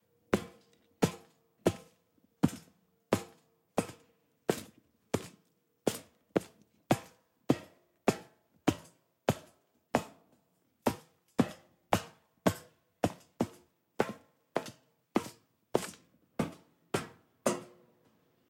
footsteps-metal-surface-03
field-recording, metal, footsteps